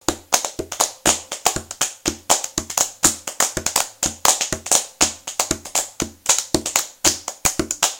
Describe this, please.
SHOT HER DOWN2 Percussion
A collection of samples/loops intended for personal and commercial music production. For use
All compositions where written and performed by
Chris S. Bacon on Home Sick Recordings. Take things, shake things, make things.
acapella, acoustic-guitar, bass, beat, drum-beat, drums, Folk, free, guitar, harmony, indie, Indie-folk, loop, looping, loops, melody, original-music, percussion, piano, rock, samples, sounds, synth, vocal-loops, voice, whistle